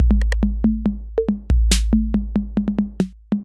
70 bpm drum loop made with Hydrogen